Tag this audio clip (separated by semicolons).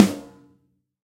drum; processed; real; sample; snare